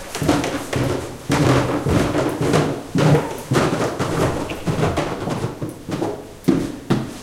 fast footsteps on wooden stairs. Olympus LS10, internal mics
wood, field-recording, stairs, footsteps